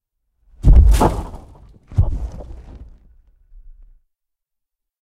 Monster Footsteps 01
This is an edited file of an artificial monster created with sinths, real steps and multi-band EQ. Hope you like and find it helpfull
Effect, Monster, footsteps